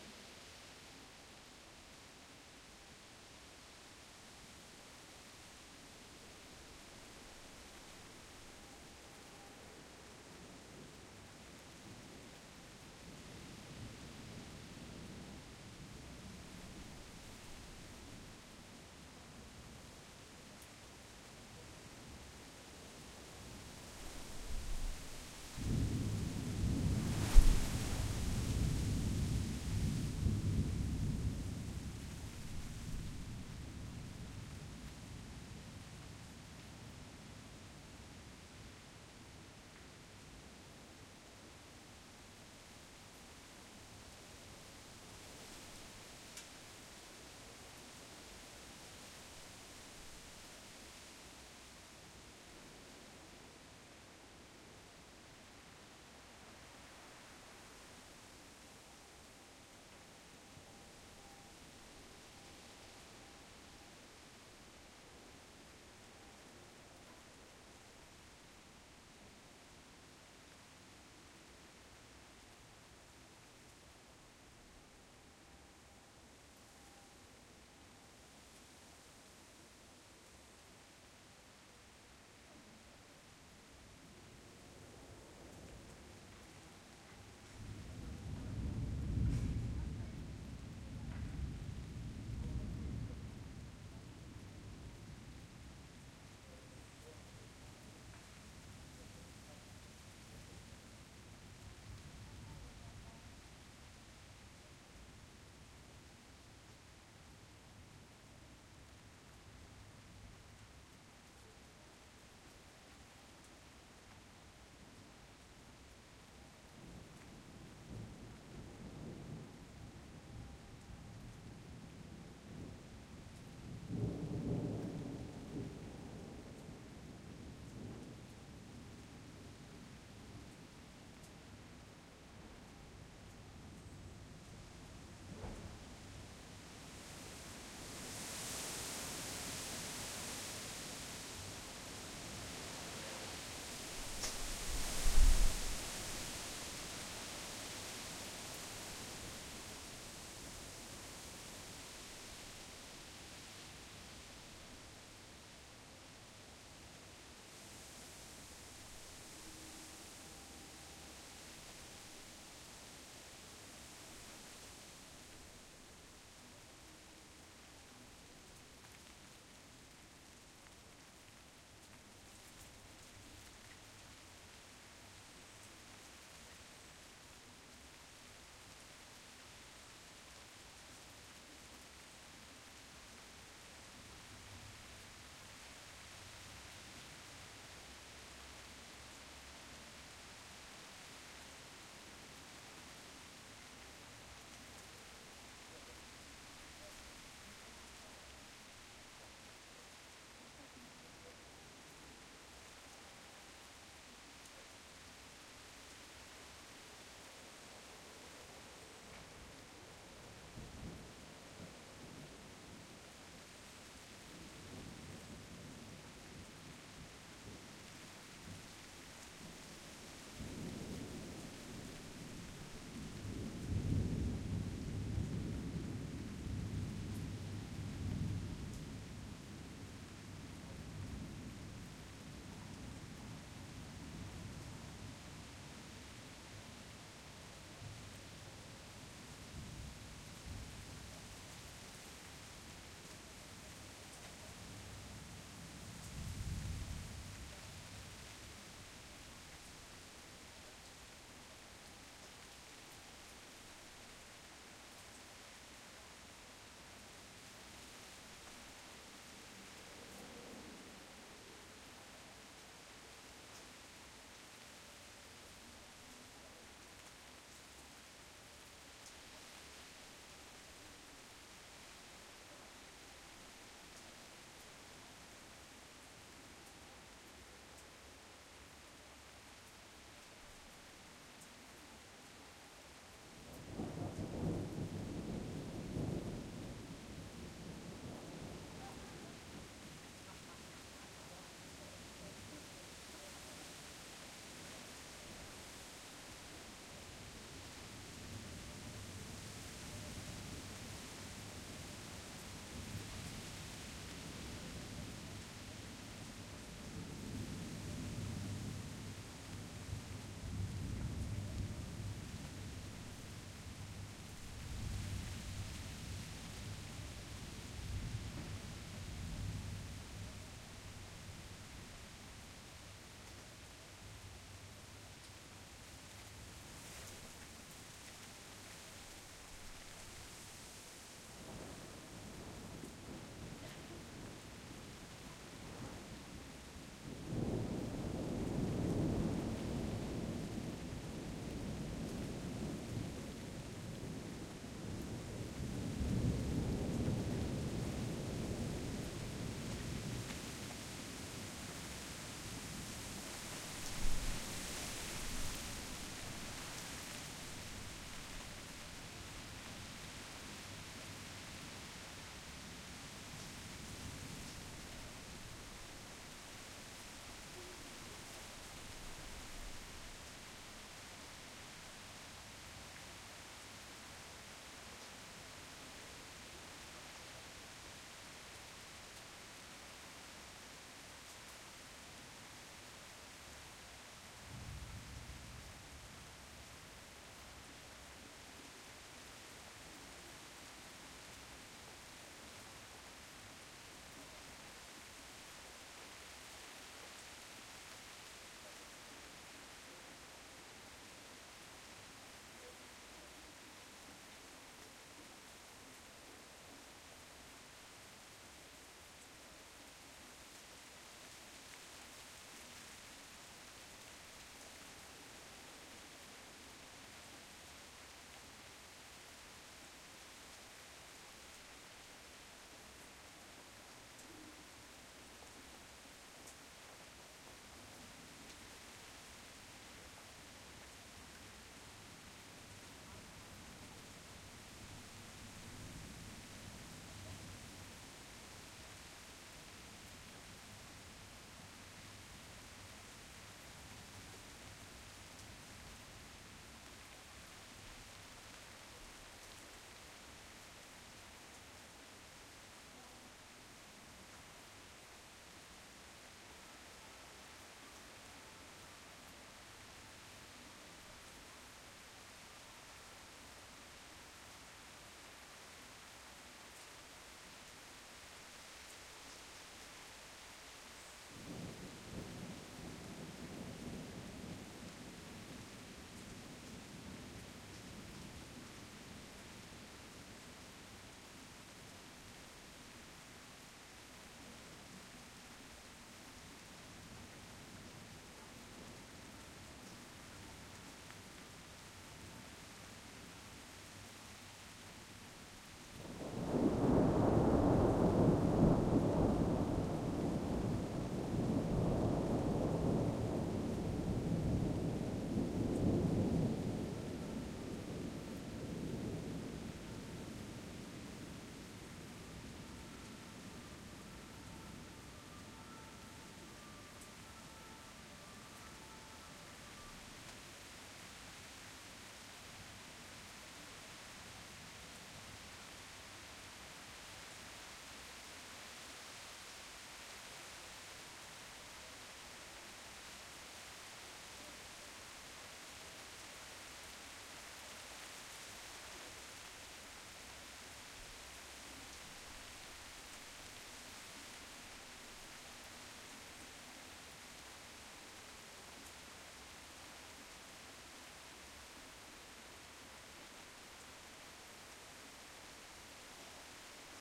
Not very exciting, a thunderstorm in the distance, record in Hanover / Germany in July 2008, using an AudioTechnica microphone AT835ST, a Beachtek preamp and an iriver ihp-120.